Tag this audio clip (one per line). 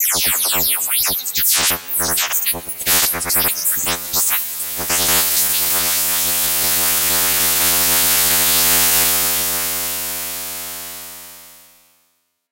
sci-fi
trees
ambiance
ambience
background
ambient
atmosphere
image-to-sound
bitmaps-and-waves
soundscape